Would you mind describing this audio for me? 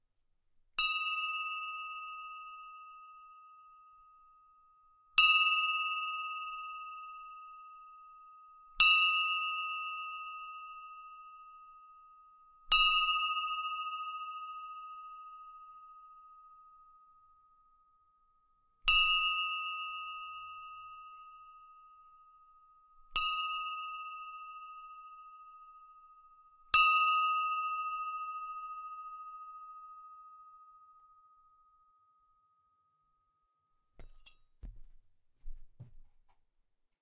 Bell Short Quiet Tings
Quiet tings made by gently tapping a 6" bell with the clapper. Recorded with an RV8 large diaphram condensor mic.
Bell, Ringing, Ting